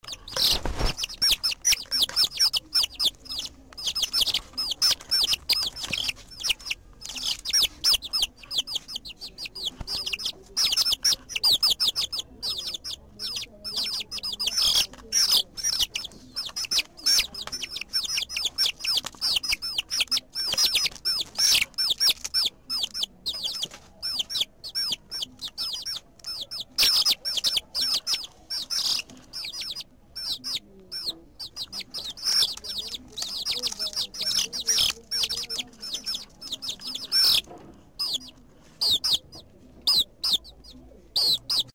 pollitos chiken lillttle bird ken
Grabacion de unos pollitos recien nacidos en su corral
birds, chiken, ken, little, poliitos